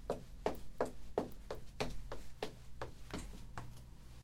footsteps on wood